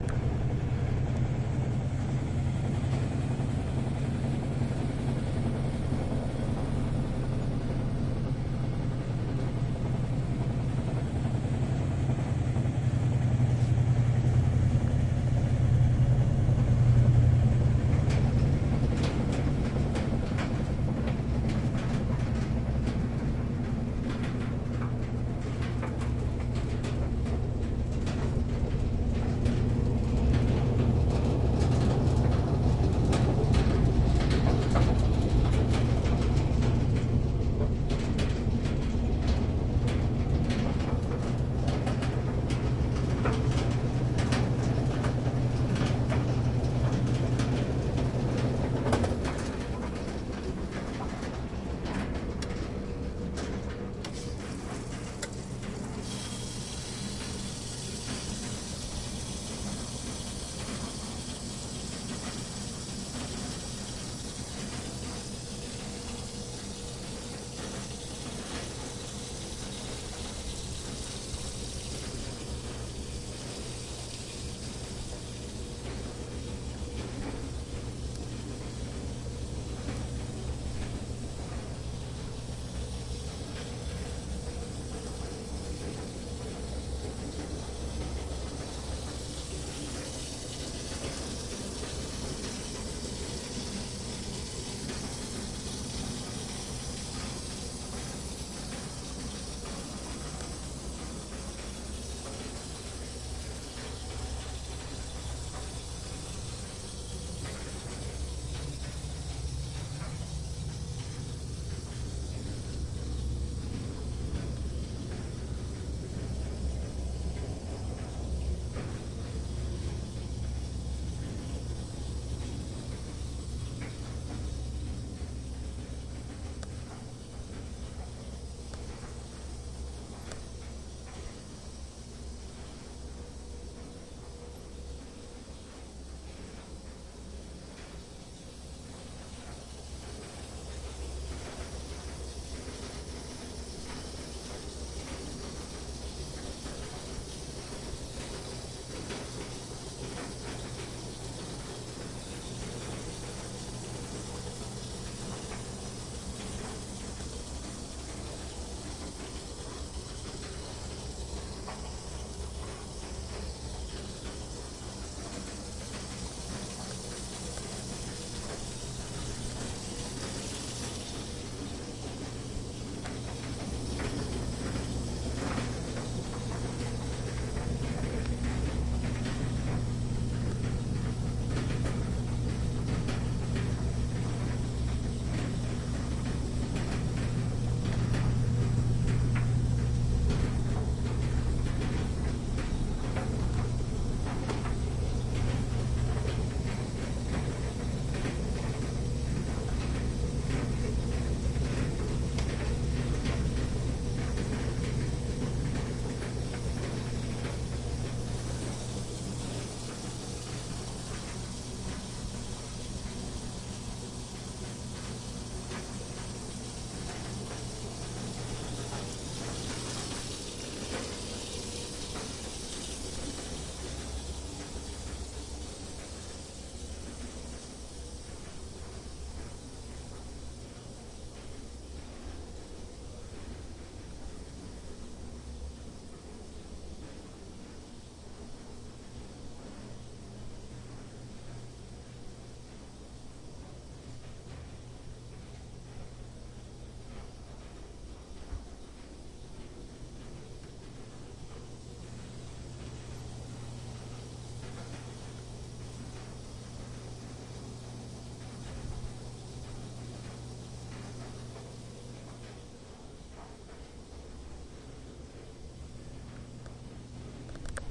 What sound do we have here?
Stereo 120 field-recording of a washer and dryer.
Washerdryer, field-recording